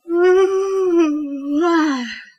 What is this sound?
real yawn
Do you have a request?